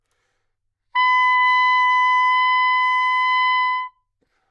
Sax Soprano - B5
Part of the Good-sounds dataset of monophonic instrumental sounds.
instrument::sax_soprano
note::B
octave::5
midi note::71
good-sounds-id::5599
neumann-U87, single-note, soprano, good-sounds, sax, B5, multisample